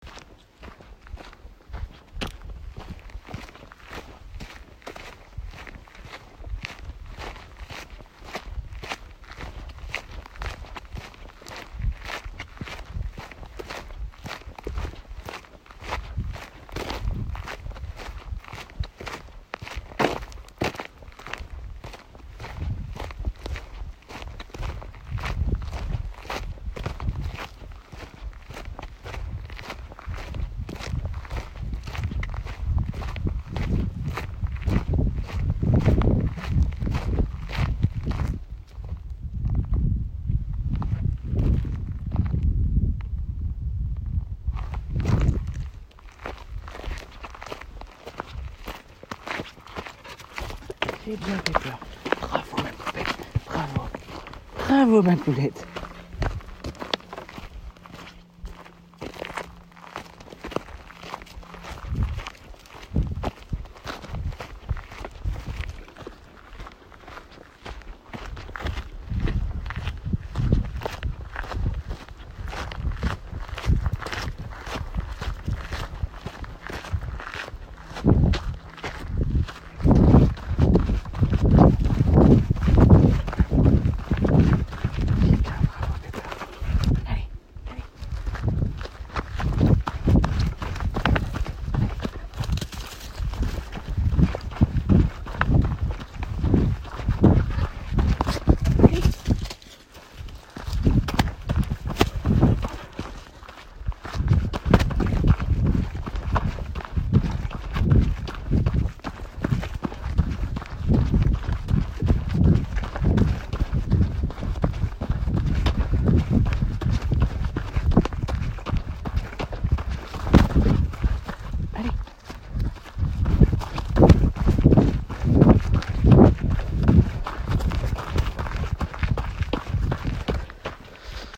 This is a recording of me walking in the mountains in the south of France, you can hear me briefly talking to my dog, but mostly the sound of the shoes on the dust and rocks.
Recorded with an iPhone 10.
crackling, field-recording, mountain, mountains, rocks, sand, walking